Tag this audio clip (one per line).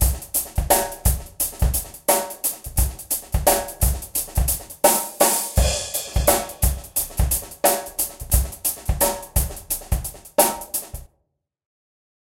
beat
drum
loop
remix
roseanna
toto